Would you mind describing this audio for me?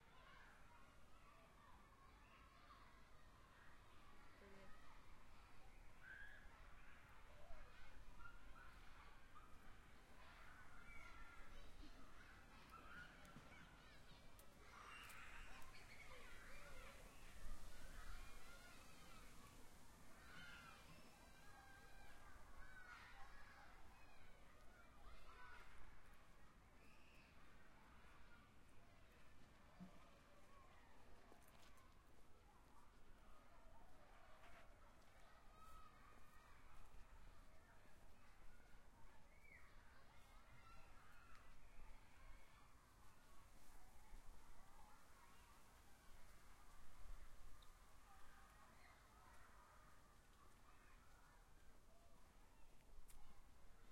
Kids in the playground
Background noise of children playing in the school-yard on a sunny day.
shouting, yelling, playground, school-yard